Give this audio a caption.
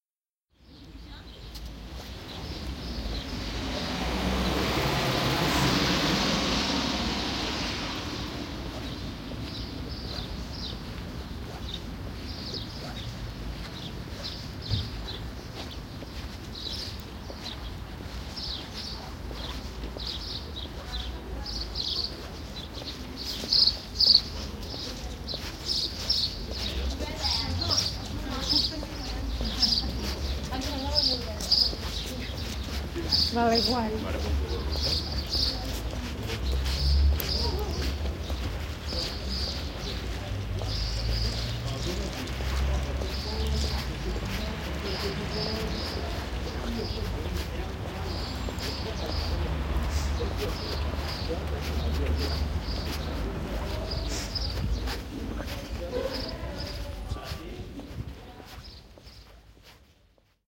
Sound Walk to UVic
This sound recording was done during a class exercise. It was recorded in University of Vic.
ambient, bird, birds, campus, car, field-recording, soundscape, university, uvic